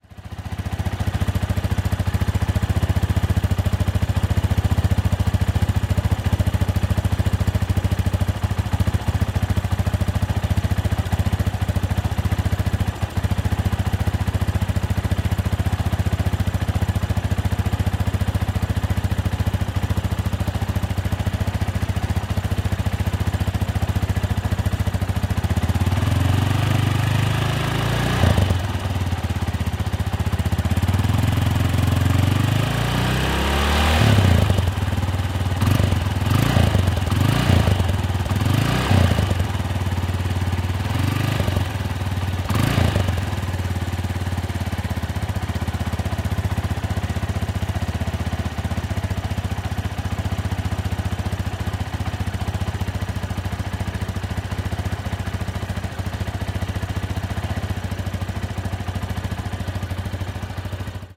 Motorcycle Yamaha Tenere 250CC Enduro Idle
250cc, acceleration, bike, close, Enduro, engine, exhaust, Idle, motorbike, motorcycle, sfx, transport